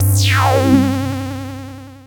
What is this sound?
analog lazer fx
From a Minimoog
synthetizer, soundfx, analog, moog, sfx, minimoog, lazer, fx, laser, synth